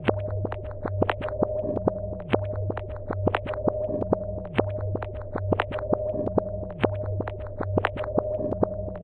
One in a small series of odd sounds created with some glitch effects and delays and filters. Once upon a time these were the sounds of a Rhodes but sadly those tones didn't make it. Some have some rhythmic elements and all should loop seamlessly.
ambient, sound-effect, synthesis, experiment, glitch, delay, noise